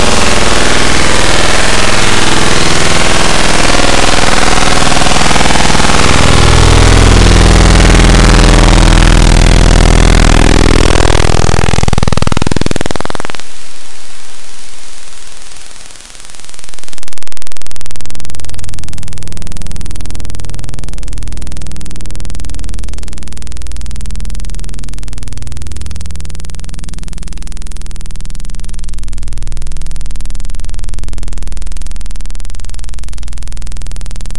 check your volume! Some of the sounds in this pack are loud and uncomfortable.
Menacing machine drones, it goes eerily quiet and an uncomfortable clicking and static feedback builds up.
abstract, audacity, computer, data, drone, electric, glitch, glitchy, machine, menacing, noise, ominous, raw, sci-fi, static